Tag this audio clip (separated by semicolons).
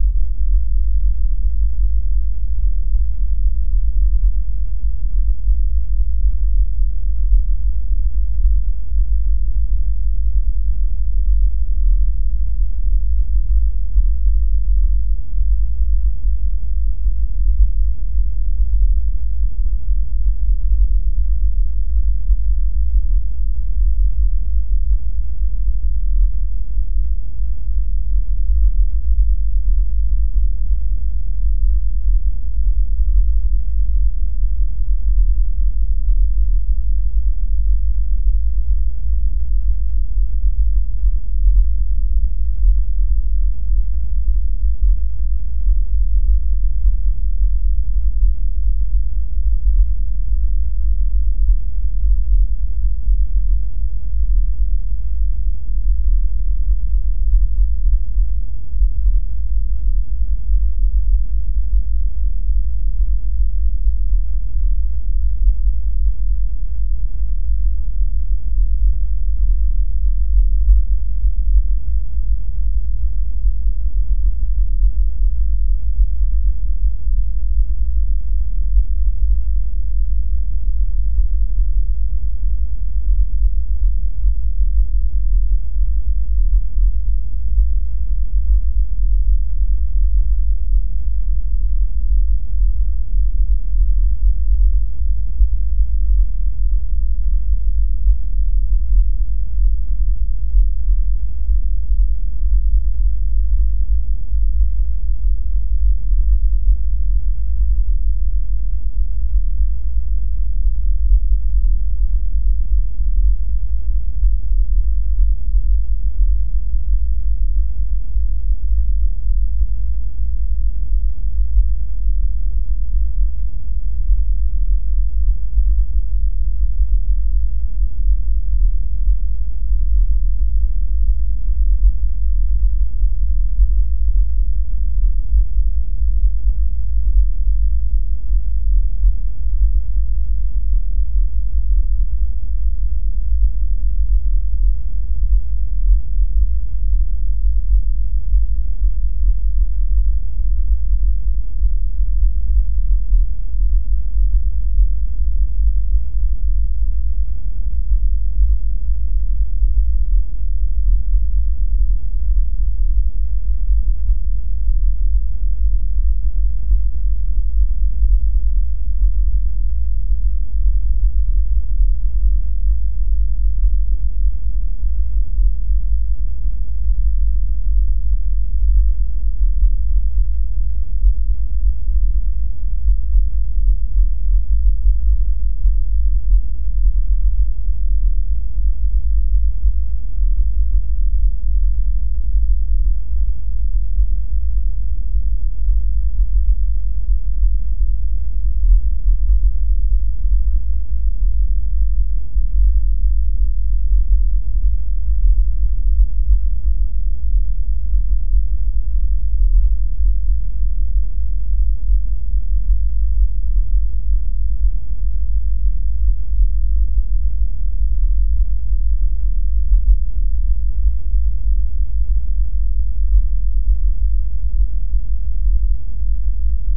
bass; computer-generated; deep; hum; low; rumble